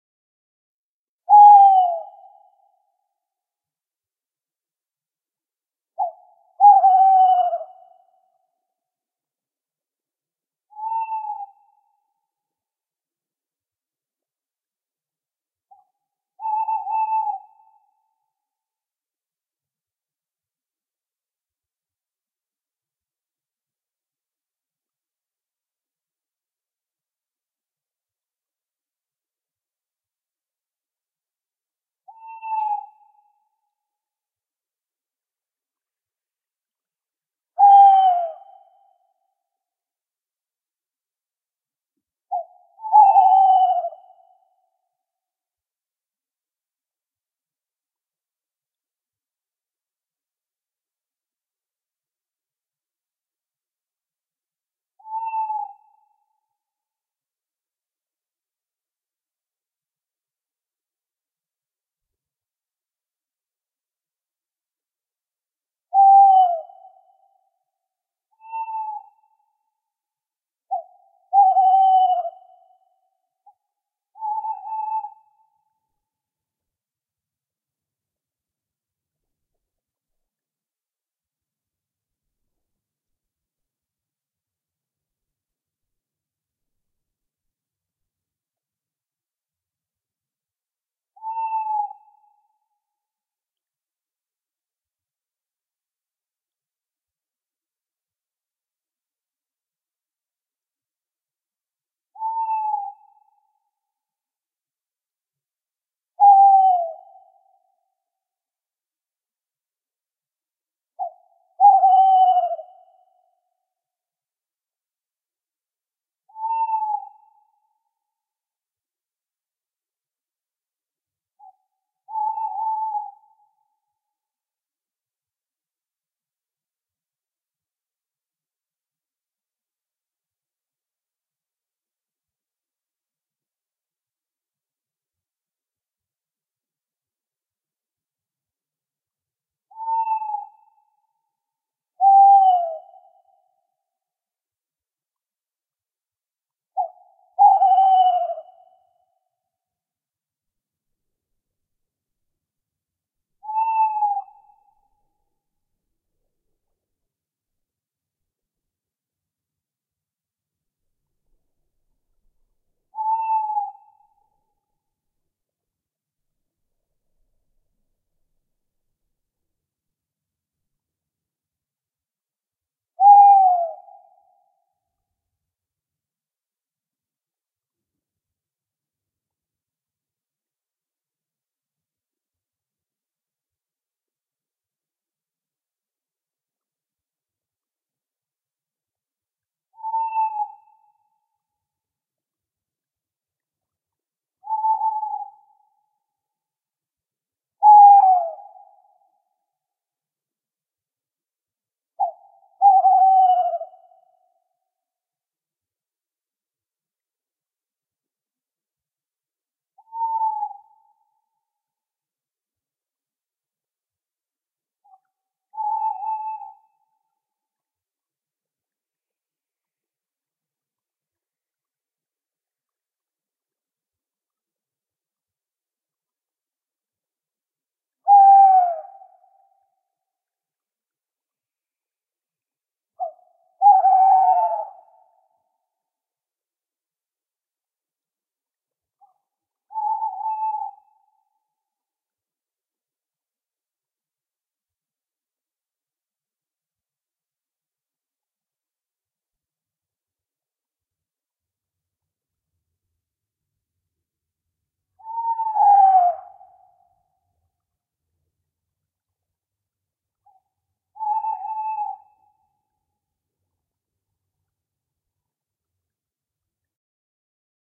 Tawny Owls 2
A stereo field-recording of two male Tawny owls (Strix aluco) hooting. Edited for noise. Rode NT-4+Dead Kitten > FEL battery pre-amp > Zoom H2 line in.
tawny-owl, owl, hoot, strix-aluco